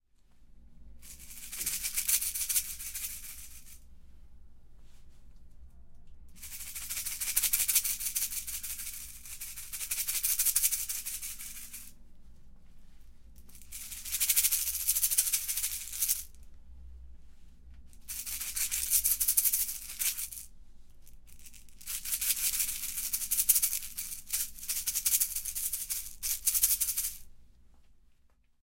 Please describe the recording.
tribal,música, fondo
fondo, m, sica, tribal